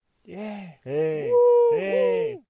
This audio represents whe a group of people celebrate something.
Celerating, Party, Sound